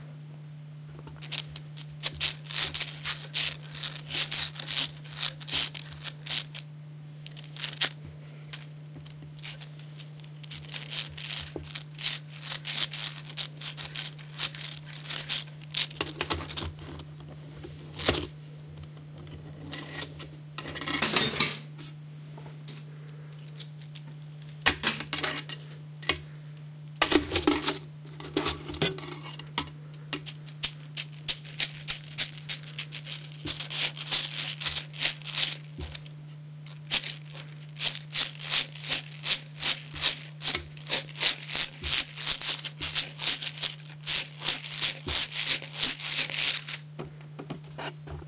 Stretching, clacking, and otherwise playing with a big plastic slinky.
Recorded with a Canon GL-2 internal microphone.
clack, request, slinky, stack, toy, toys, unprocessed